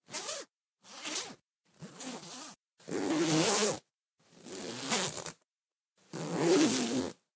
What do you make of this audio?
Two different zippers going up and then down.
backpack, bag, clothes, clothing, coat, jacket, luggage, undress, unzip, unzipping, zip, zip-down, zipper, zippers, zipping, zip-up